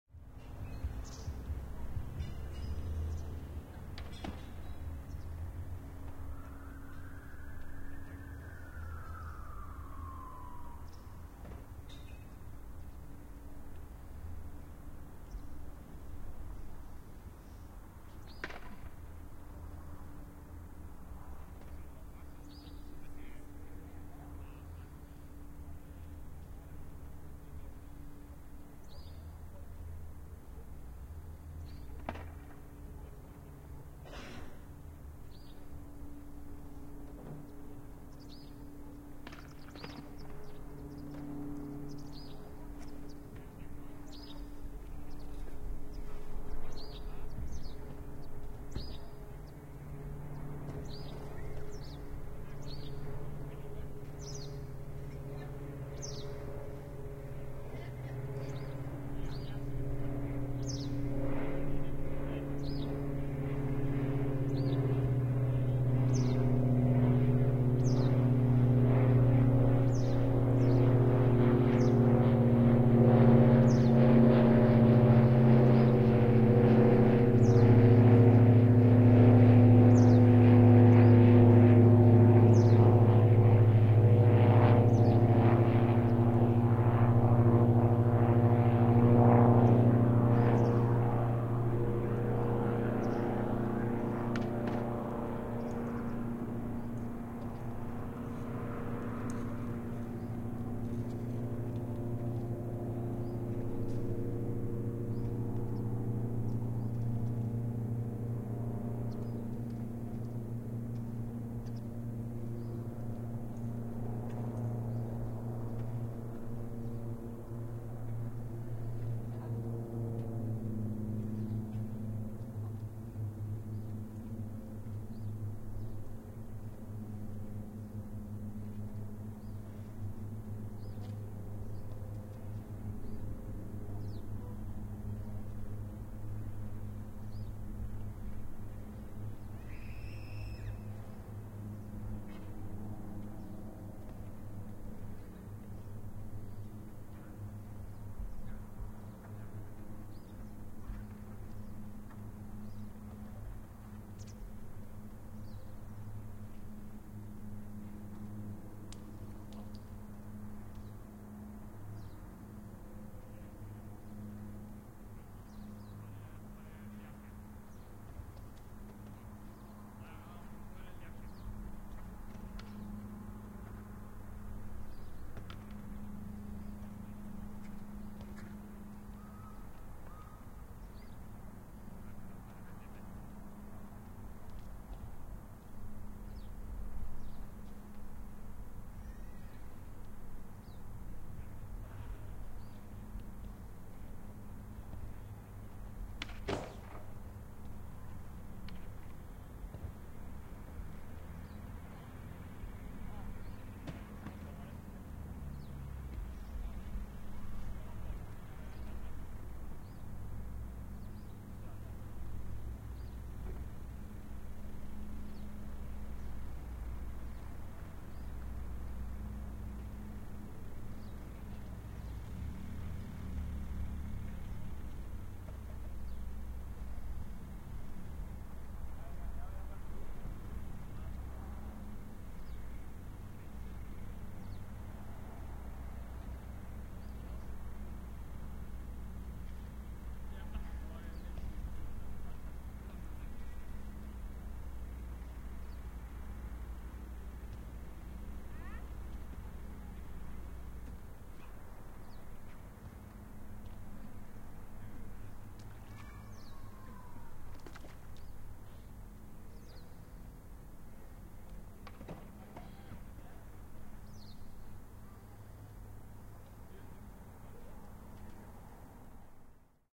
Diamond Aircrafts DA40 fly by Notodden
A Diamond Aircrafts DA40 taking off from the Airport of Notodden and flying by, over the lake Heddal, recorded on the waterfront by the Book and Blues House.
aircraft, airplane, fly-by, flyby, plane, propeller, take-off, takeoff